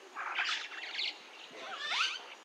A bird in Tanzania recorded on DAT (Tascam DAP-1) with a Sennheiser ME66 by G de Courtivron.
africa, bird, tanzania